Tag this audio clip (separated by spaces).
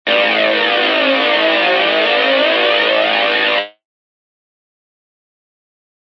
Drum-and-Bass Distortion Lead Rough Synth